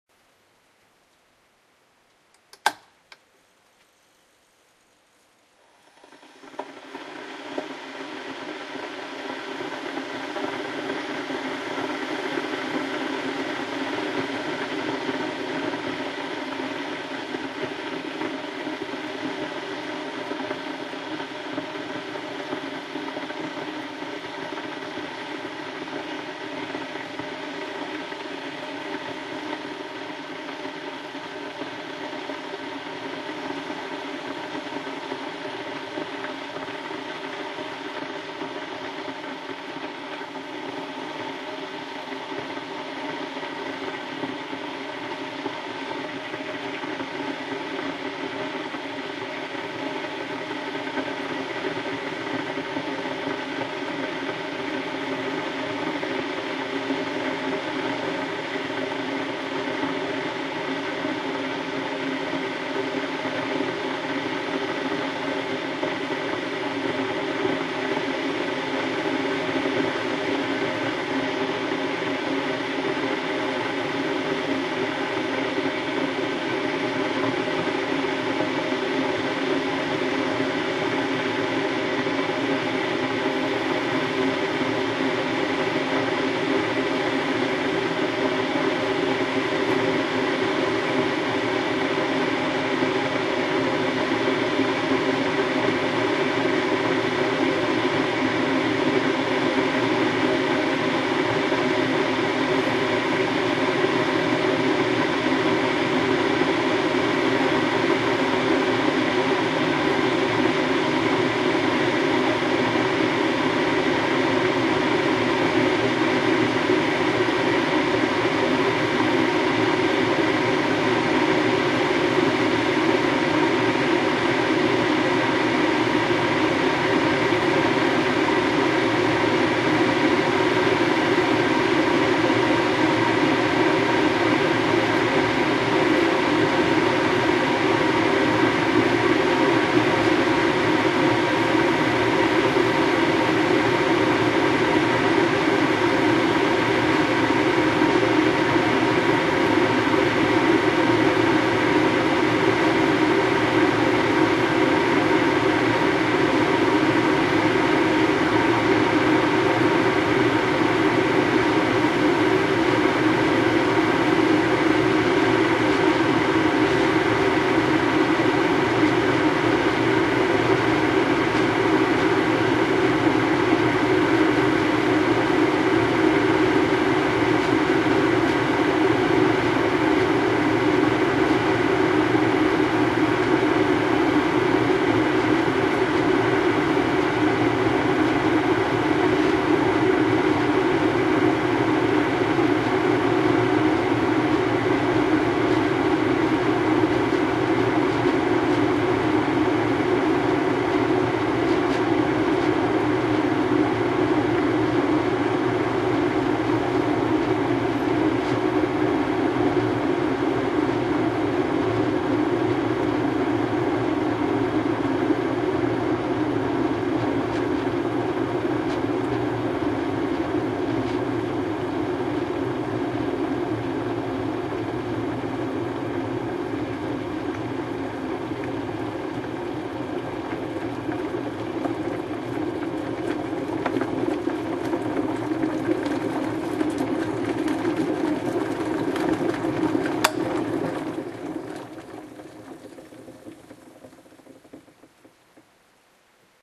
My kettle boiling as I make my morning tea. Somehow I find the sound of the kettle soothing. Recorded with CanonLegria camcorder.
boil, boiling, CanonLegria, kettle, kitchen, water